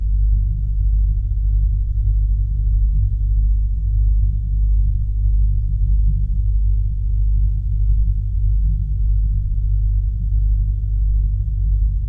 generator room
This is the back of my fridge, with some filters applied to it. Sounds like some kind of space-ship ambient. I might be able to record a longer version of this. If you want it, request it in the comments.
ambience, ambient, generator, spaceship